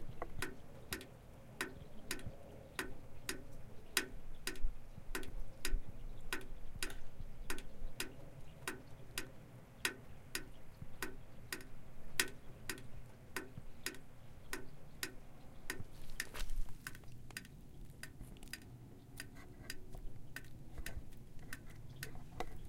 Water falling to the bottom of a rain gutter